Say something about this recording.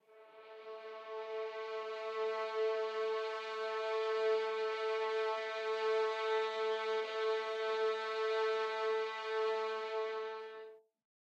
One-shot from Versilian Studios Chamber Orchestra 2: Community Edition sampling project.
Instrument family: Strings
Instrument: Violin Section
Articulation: vibrato sustain
Note: A3
Midi note: 57
Midi velocity (center): 63
Microphone: 2x Rode NT1-A spaced pair, Royer R-101 close
Performer: Lily Lyons, Meitar Forkosh, Brendan Klippel, Sadie Currey, Rosy Timms
midi-note-57, single-note, violin, a3, vibrato-sustain, midi-velocity-63, multisample, strings, violin-section, vsco-2